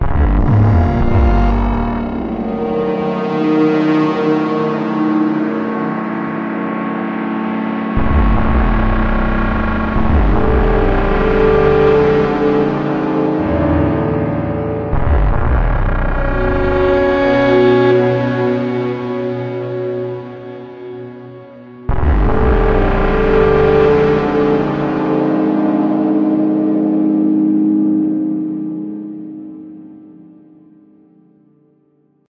Haunting Music 1
approaching, beat, cracking, dark, evil, future, futureistic, futuristic, fx, glitch, haunted, haunting, idm, orchestral, piano, planetary, sound-effects, space, spacy, spook, spooky, star, stars, strings, threat, threatening, war